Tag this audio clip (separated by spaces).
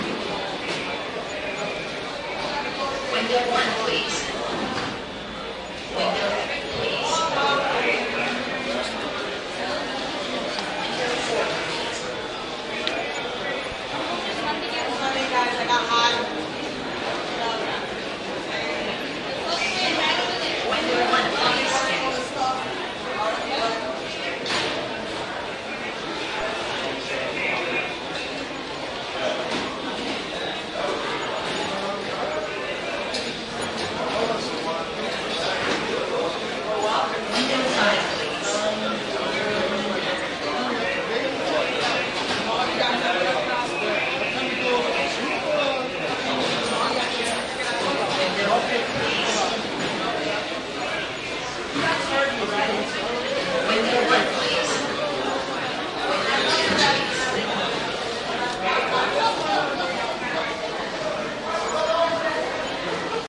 new-york-city empire-state-building field-recording